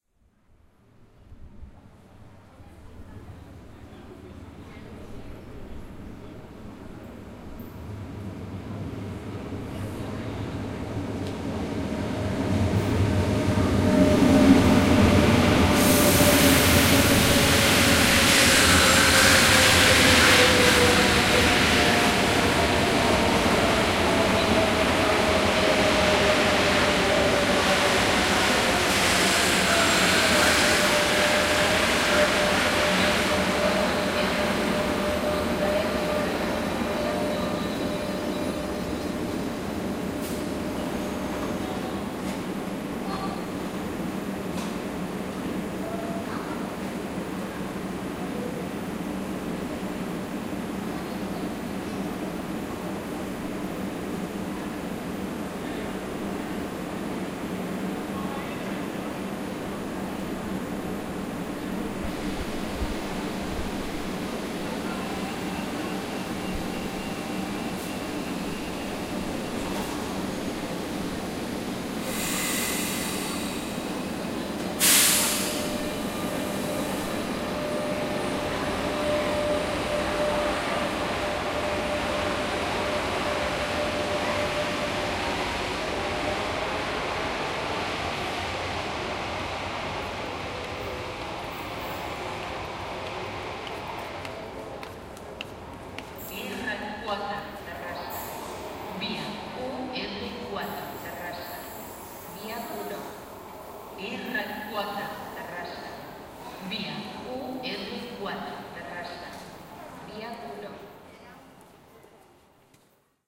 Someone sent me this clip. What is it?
Recording of a Renfe train arriving, loading passengers and leaving the Arc De Triomf station in Barcelona, Spain. Recorded with a zoom H4n. PA annoucements in Catalan at the end of the clip. some small footsteps inside the train station. Recorded on a Sunday morning.